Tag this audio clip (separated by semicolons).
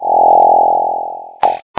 effect; popping; soundeffect